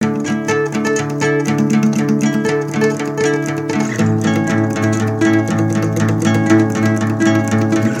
FROWNY FACE1 Guitar
A collection of samples/loops intended for personal and commercial music production. For use
All compositions where written and performed by
Chris S. Bacon on Home Sick Recordings. Take things, shake things, make things.
drum-beat, acoustic-guitar, guitar, percussion, sounds, indie, Folk, free, original-music, piano, synth, samples, voice, drums, vocal-loops, whistle, beat, loops, acapella, bass, loop, looping, Indie-folk, harmony, melody, rock